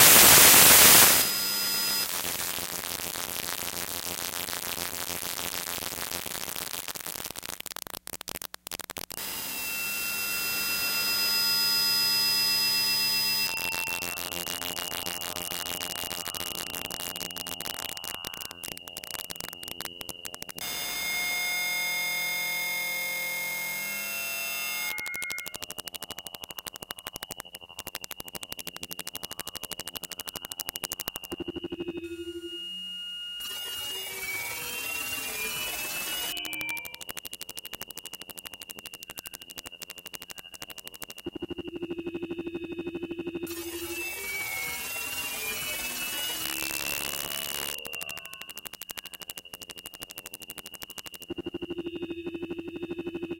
pulsar synthesis 05
Sample generated with pulsar synthesis. A noisy burst which fades out into crackles and then repeats somewhat rhythmically with varying amounts of tonal drone.